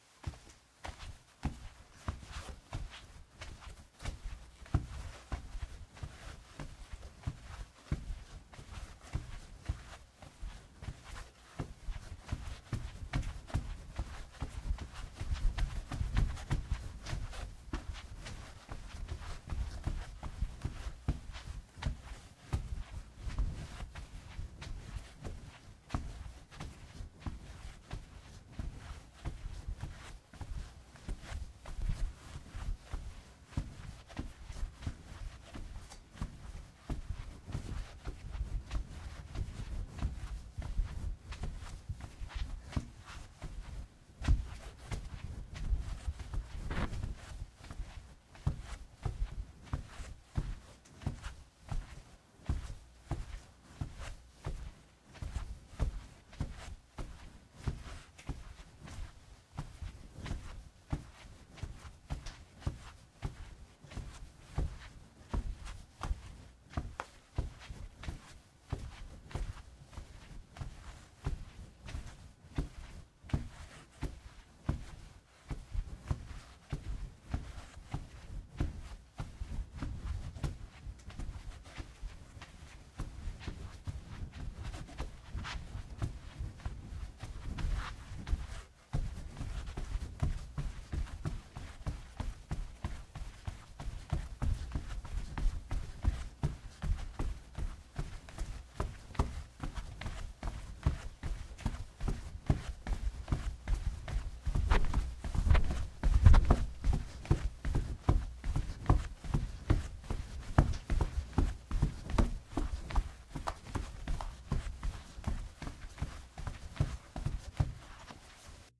Carpet Dress Shoes
Dress shoes making various noises on a carpet. Recorded using a Sennheiser MKH-50 and a Gyraf G9 preamp.
shuffling, walk